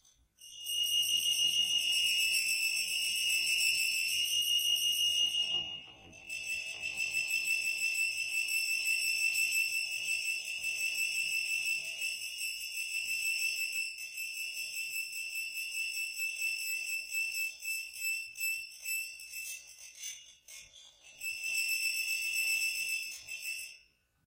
High pitched squealing that could be used for a variety of effects.
This sound is a modification from the sound "Creaking Metal Desk".
Recorded with: Shure SM57 Dynamic Microphone.